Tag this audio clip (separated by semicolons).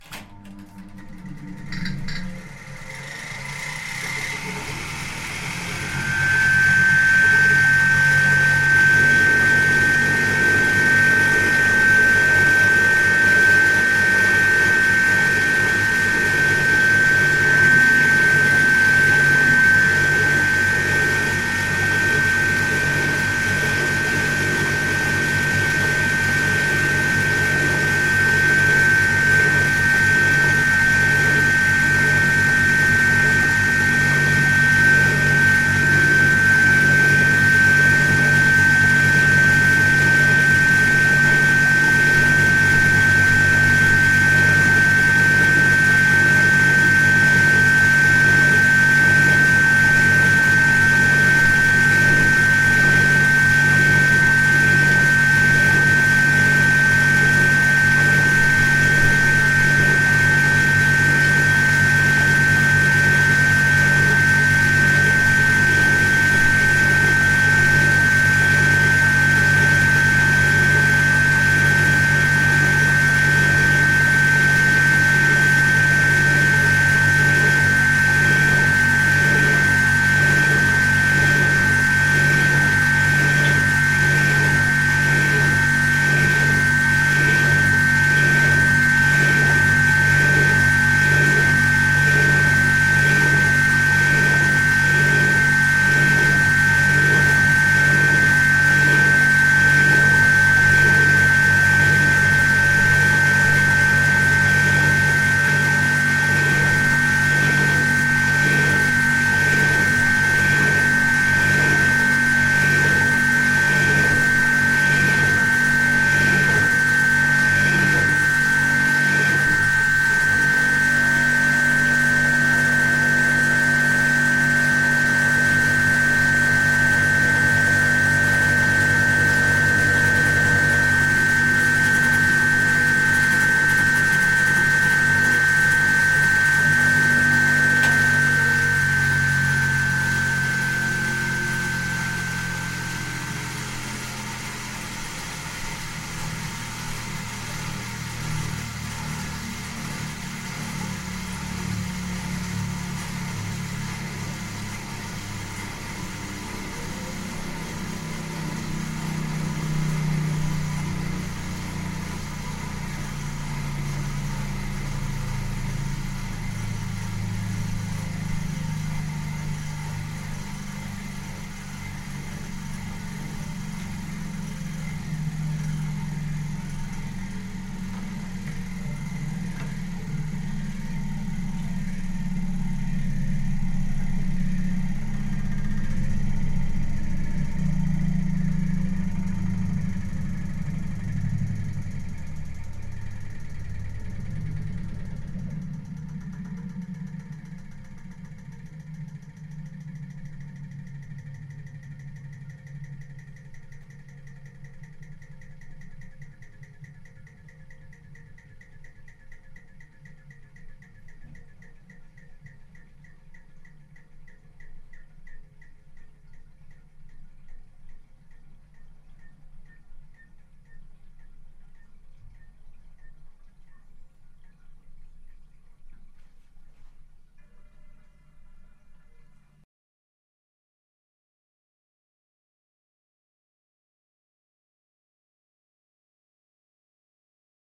drain; household; laundry; noise; soviet; USSR; wash; washing-machine; water